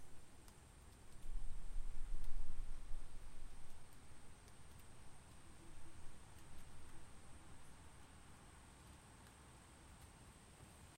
Recording at the start of a storm. Recorded on an Audio-Technica AT2020USB+